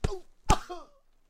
Two punches with reaction sounds recorded in studio